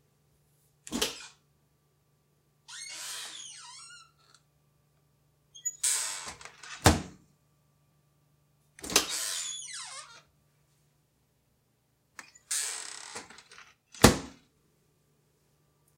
Squeaky Appliance Door
Opening/closing a microwave door.
appliance, close, closing, door, handle, latch, metal, open, slam, squeaky